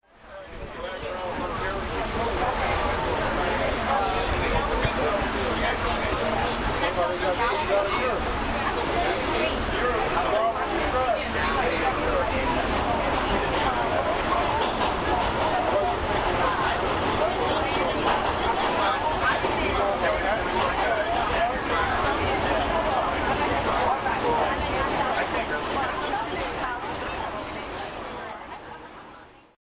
Simulação de um modelo de aparelho mais antigo, sem programação para distinguir sons “mais importantes”, faz com que a ampliação do ruído do comboio e das pessoas a conversar ao mesmo tempo.
rudo, comboio, conversa